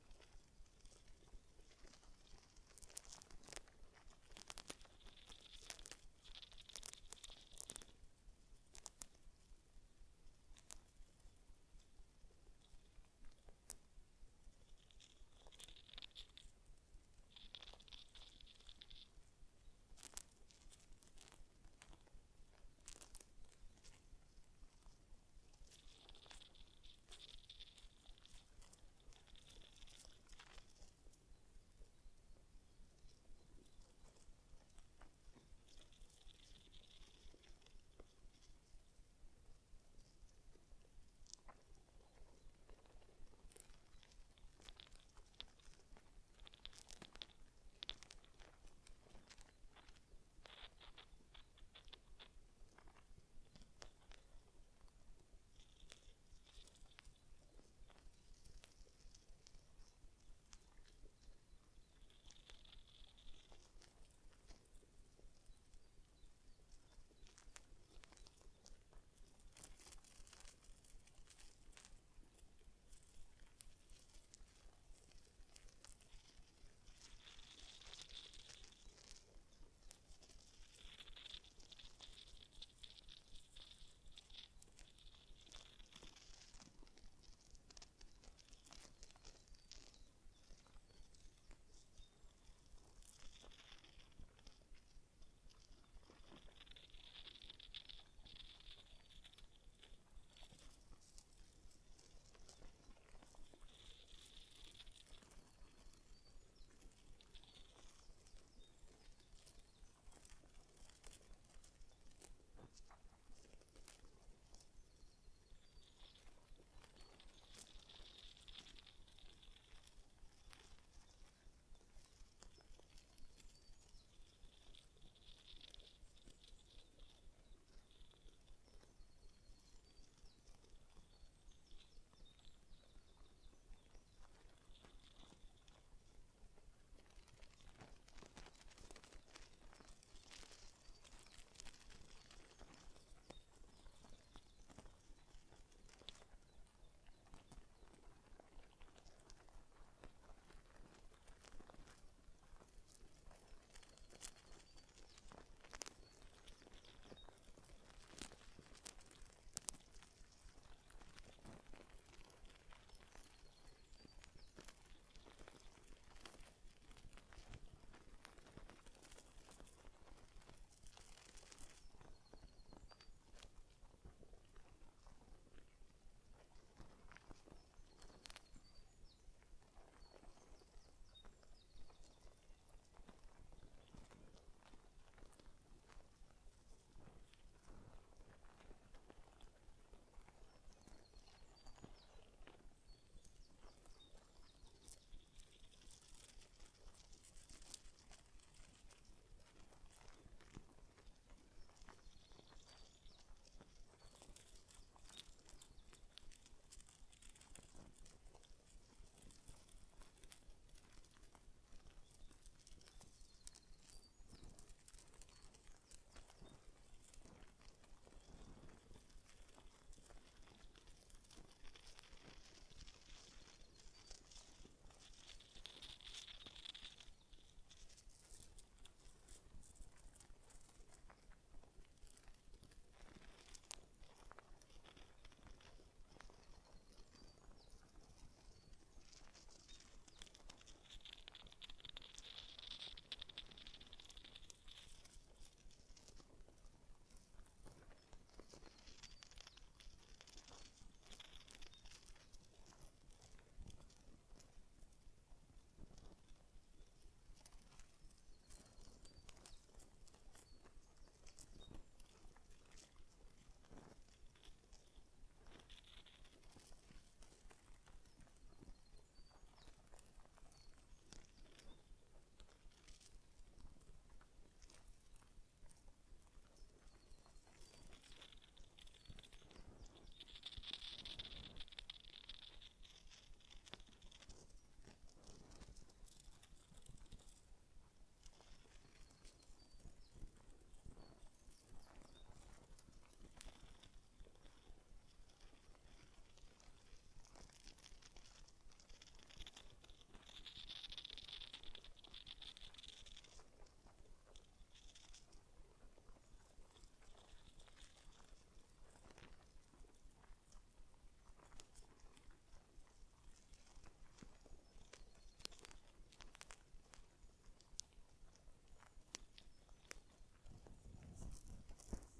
Wood ants recorded with a home-made contact microphone. The mic was placed on the ants nest. Recorded with a Zoom H5. The location was a small woodland in Essex, UK. No ants were harmed while making this recording!
ants, contact-mic, field-recording, insects, wood-ants